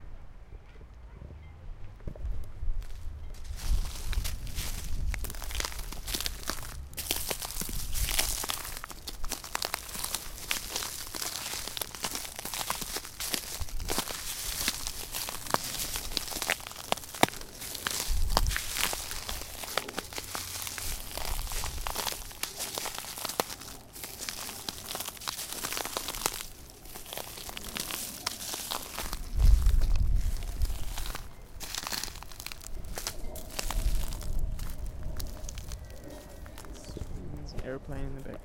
abandoned-ballroom-110414-007-walking-through-leaves
In/around an abandoned ballroom not far from Berlin.
Walking through high untouched piles of dry leaves.
crunch, leaves, rhythmic